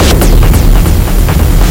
140bpm Jovica's Witness 1 8
experimental, jovica, weird, 140bpm, electro